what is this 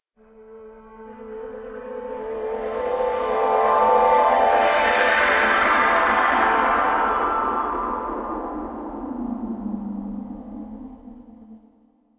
Ethereal Teleport
"Legend of Zelda: Breath of the Wild" inspired me to create this Teleport effect.
air, shimmer, pad, beam, sacred, transform, aura, celestial, effect, atmosphere, breath, sfx, ethereal, sci-fi, warp, teleporter, sound, teleport, power, zelda